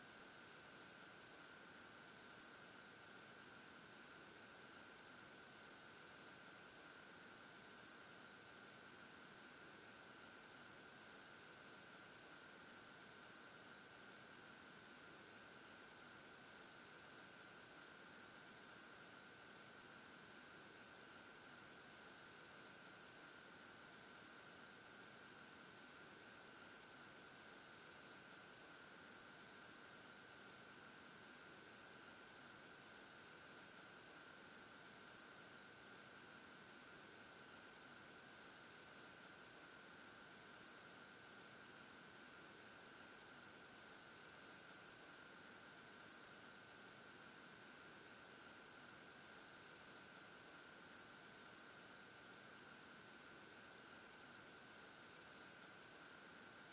Bedside lamp humming in quiet bedroom at night.